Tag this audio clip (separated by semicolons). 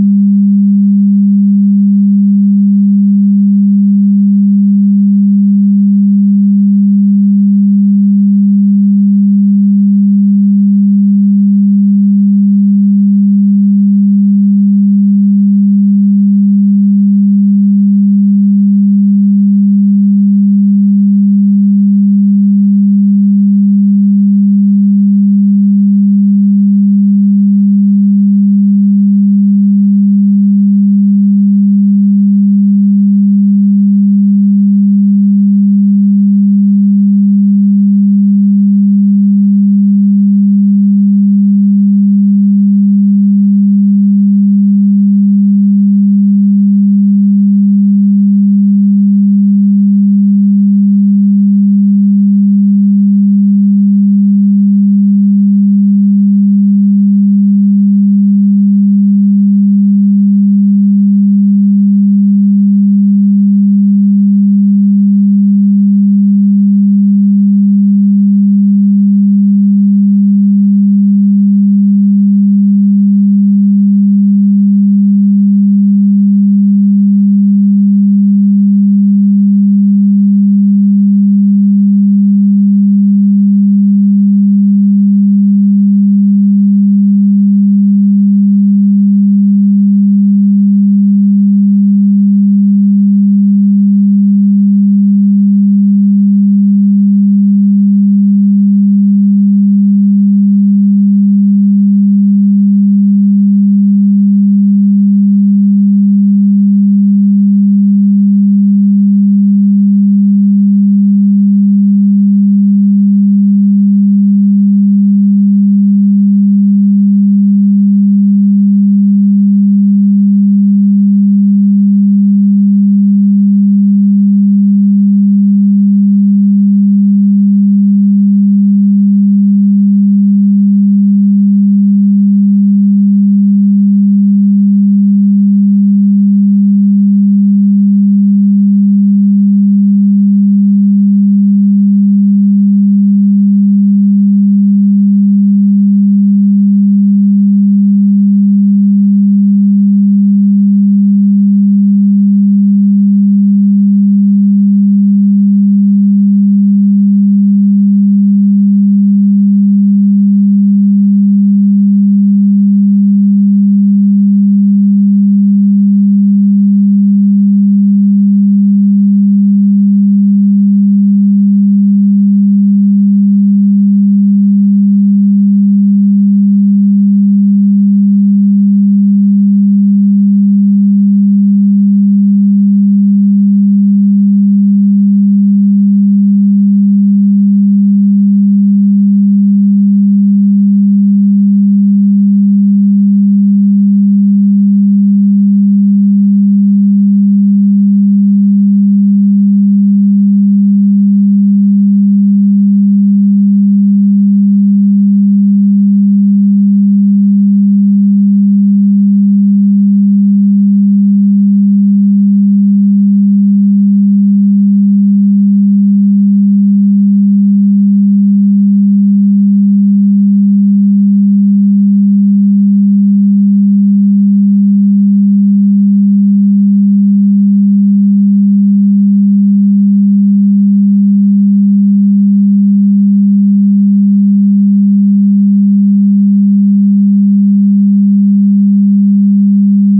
electric; sound; synthetic